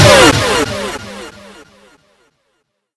Electric Shot, Or Death Sound Effect
Electric Shot! Or Death Sound Effect!
This sound can for example be used in games - you name it!
If you enjoyed the sound, please STAR, COMMENT, SPREAD THE WORD!🗣 It really helps!
shot, sfx, platformer, electric, gun, attack, cartoon, game, death, electronic, weapon, shoot